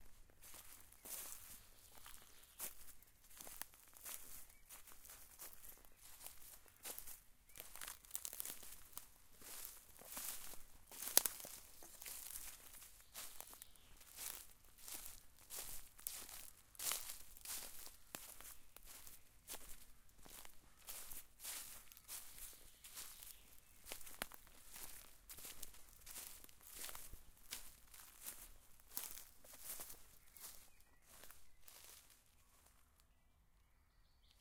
Dry day, footsteps over dry leaves in the local park/forest. Tascan DR-05.